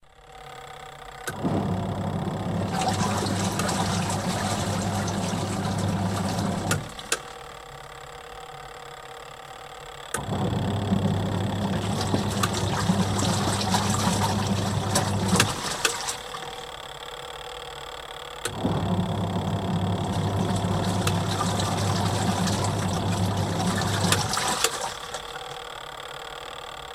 Washing Machine 10 Wash Cycle 2
bath, bathroom, domestic, drain, drip, dripping, drying, faucet, Home, kitchen, Machine, mechanical, Room, running, sink, spin, spinning, tap, wash, Washing, water